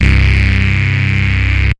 SYNTH SAW BASS